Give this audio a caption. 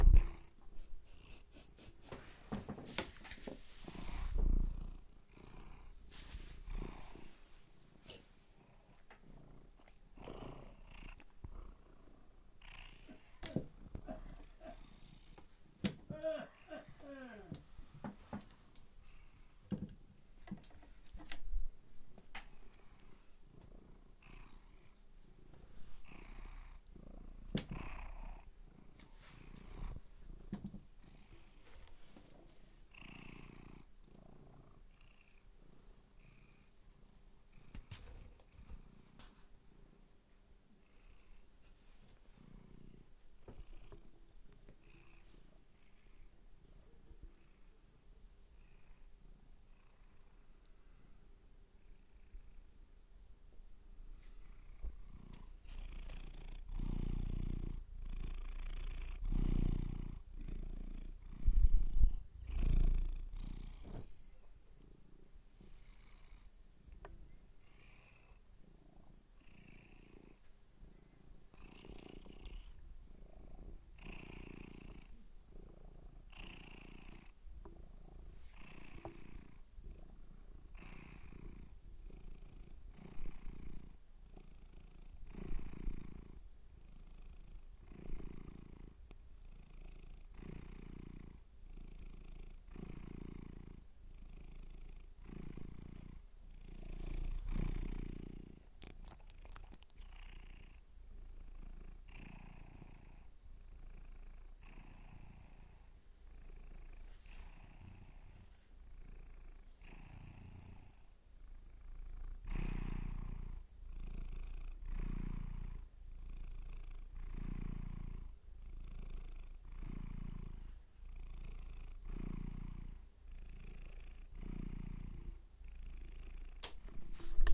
cat purr
cat, pet, purring